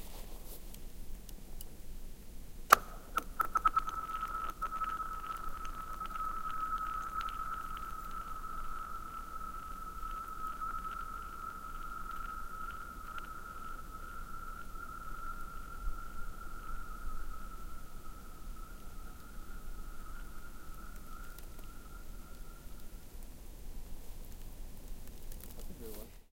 A rock is thrown onto a lake just after it freezes over. The ice is so thin that a magical sound is made as it glides along until it finally stops.